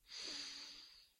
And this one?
A guy taking a sniff.
sick, sniffing